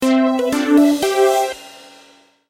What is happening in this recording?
game-win
This is a small fanfare progression for when a player wins a game. Created in GarageBand and edited in Audacity.